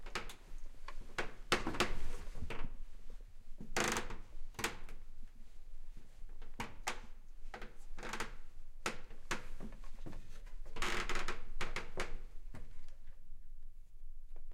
Recorded inside an organ, stepping on old wood floor, creaking sound, room acoustics very present. faint sound of clothes and steps also audible. M-S Recording: M on left channel, S on right.